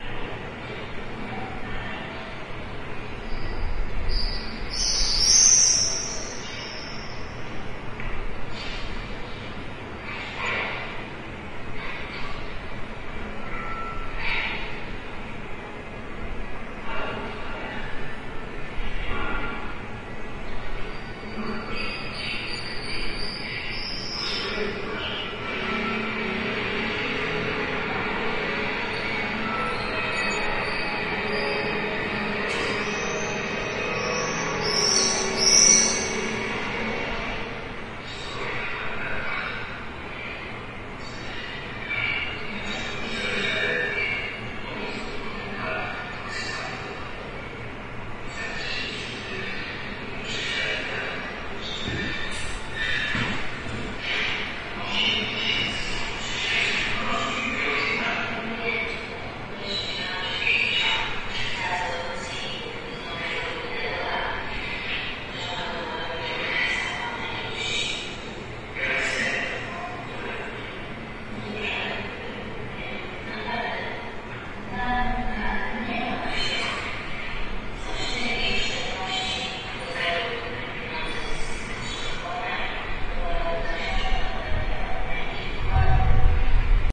June 2009 Poznan/Poland: Sunday courtyard of old tenement in the center of Poznan; sounds of Polish serial M jak Milosc (somebody watching it, sounds is audible by open window on 4 floor) plus squealing swallows